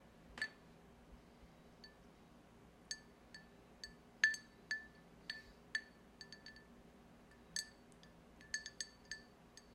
Ice floating in a glass of water and impacting the walls of the glass.